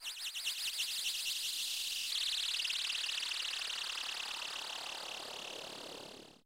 Sounds like a Chidori from the anime naruto, but then the retro version of it.
Thank you for the effort.